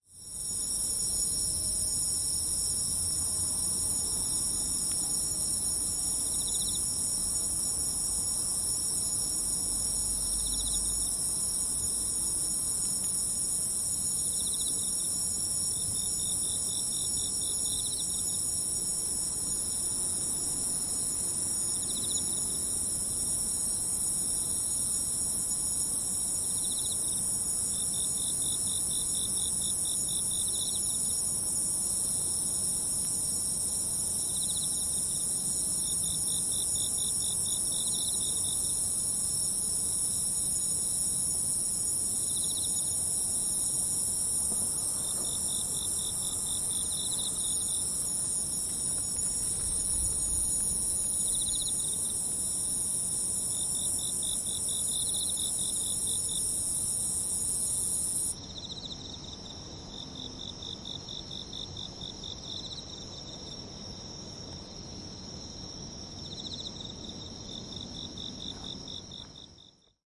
Recorded early September 2016, midnight, Kashiwa, Japan. Equipment: Zoom H2N on MS stereo mode.
ambience, ambient, bugs, cicadas, field-recording, late-summer, quiet, village, walk